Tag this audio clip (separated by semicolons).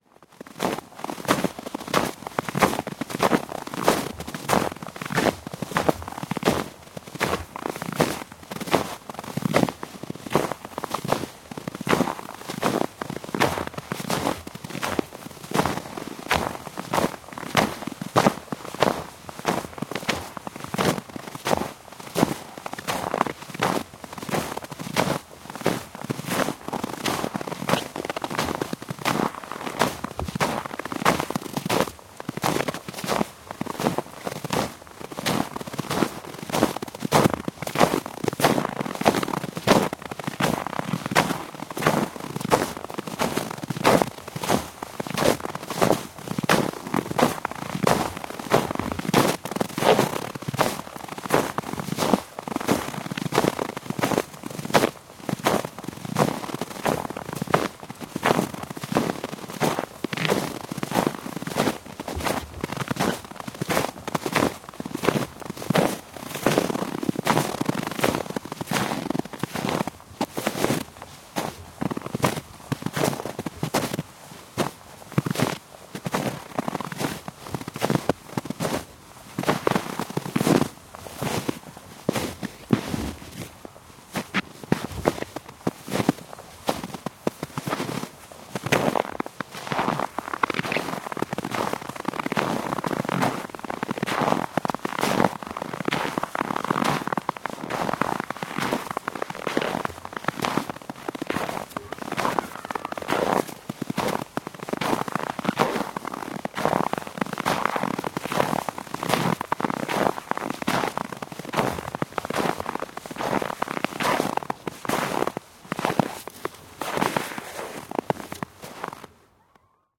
footsteps; snow; walking